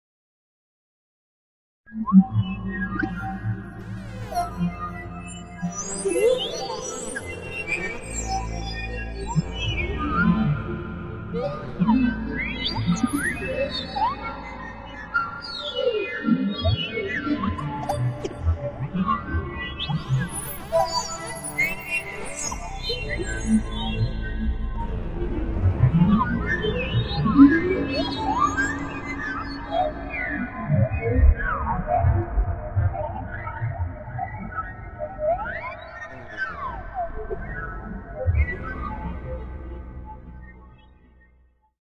Spectral Fragment of Speech
An overtone rich fragment of phased spectral material, told by an idiot, signifying nothing. (Sound and fury to follow)
abstract, electronic, flanging, fragment, FX, overtone, phasing, spectral, sweep